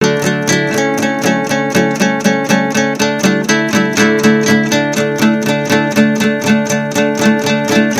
XEX Guitar
A collection of samples/loops intended for personal and commercial music production. For use
All compositions where written and performed by
Chris S. Bacon on Home Sick Recordings. Take things, shake things, make things.
Folk, Indie-folk, acapella, acoustic-guitar, bass, beat, drum-beat, drums, free, guitar, harmony, indie, loop, looping, loops, melody, original-music, percussion, piano, rock, samples, sounds, synth, vocal-loops, voice, whistle